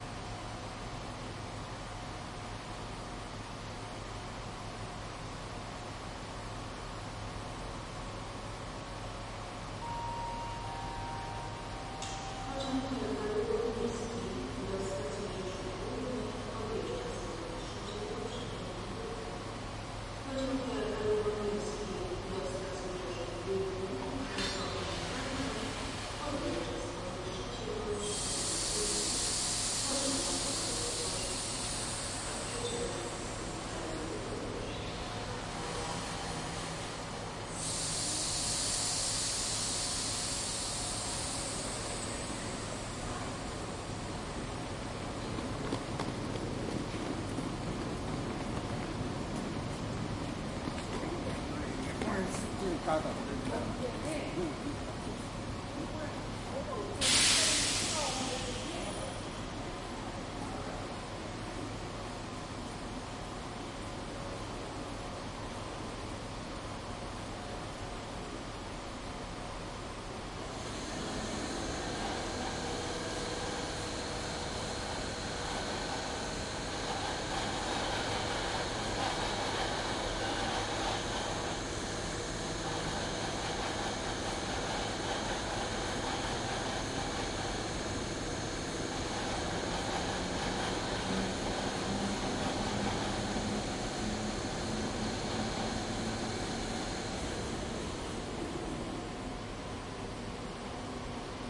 railway station 1
Krakow railway station ambience
railroad, railway, railway-station, station, train, trains